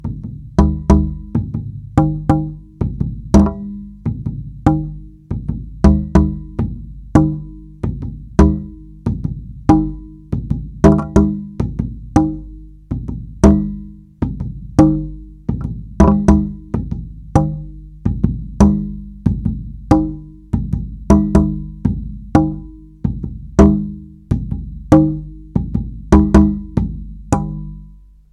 Extreme frequency for testing your ears and tweeters
ear, tones, high, test